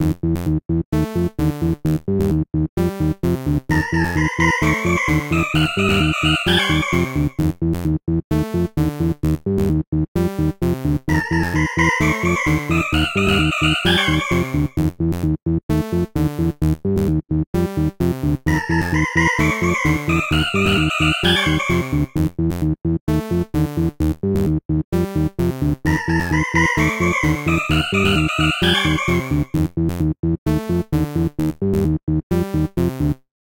Eerie Strolling
A loop for an eerie yet medium-fast paced setting.